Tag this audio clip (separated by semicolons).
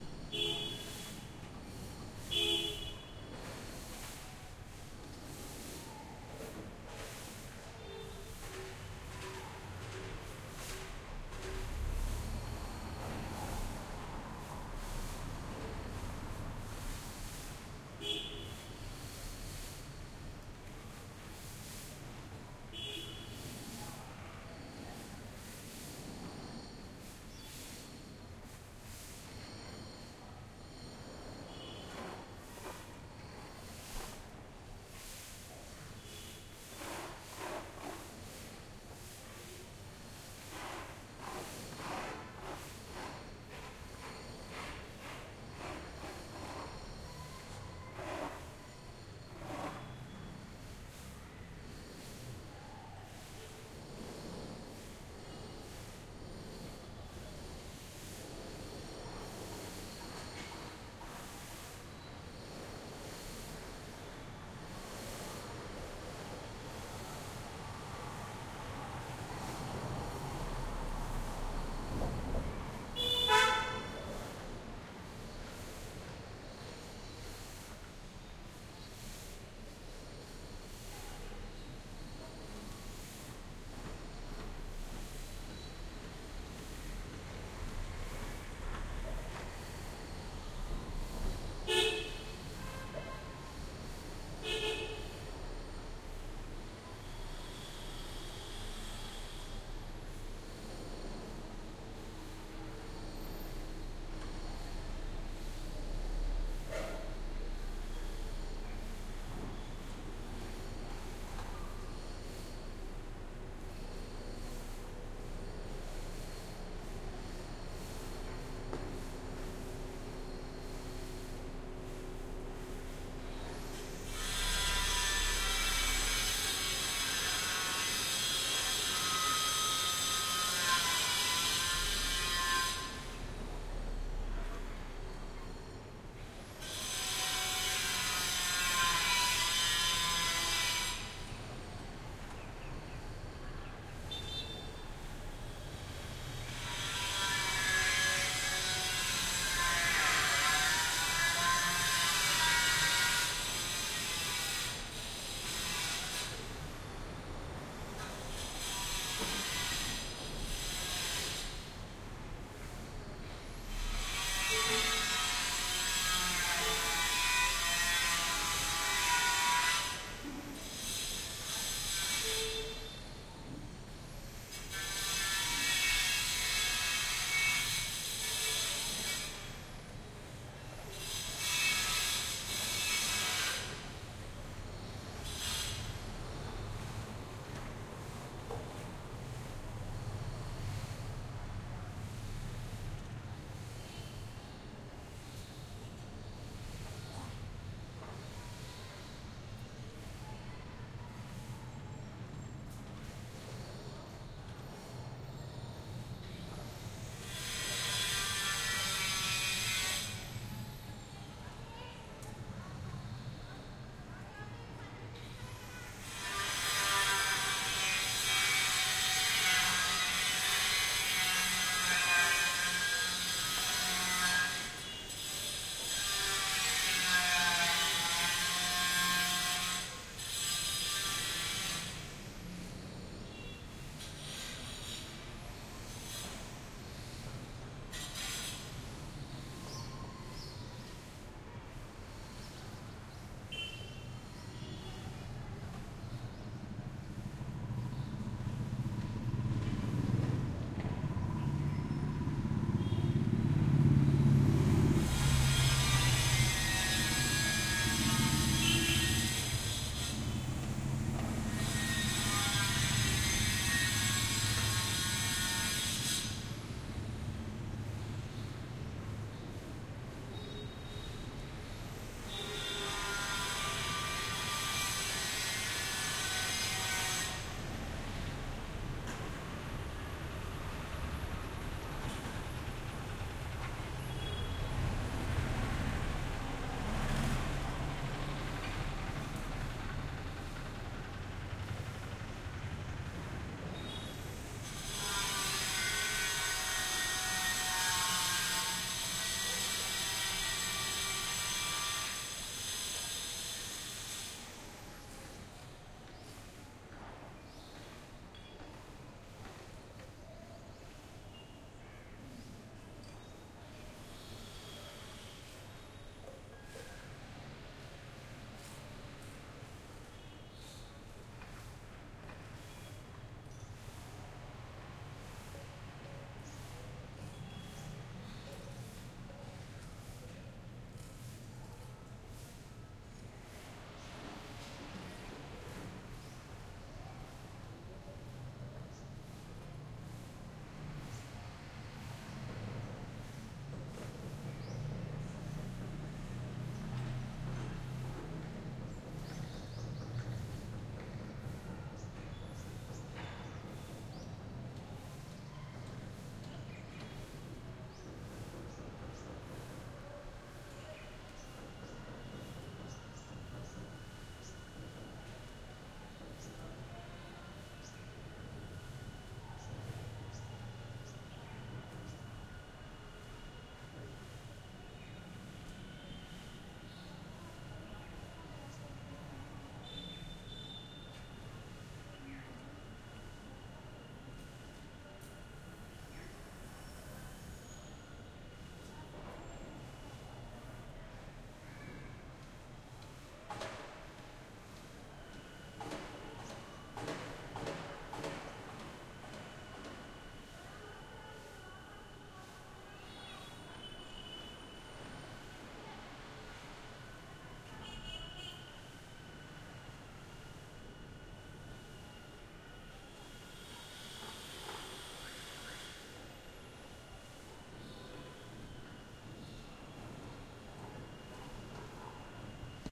ambiance; ambience; constructing; field-recording; street